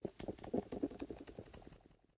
falling rocks
rocks falling
Sounds Will Be Done Boys
fun, sac, wheelbarrow